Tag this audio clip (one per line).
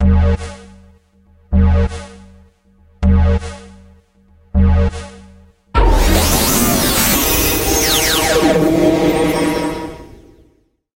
impact
background
futuristic
stinger
woosh
rise
metal
noise
cinematic
game
horror
moves
opening
abstract
metalic
destruction
Sci-fi
transition
morph
dark
atmosphere
scary
transformation
glitch
drone
transformer
hit